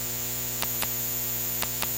phone off clocksound loop
The looping sound of a powered off, charging cell phone. Recorded with an induction coil.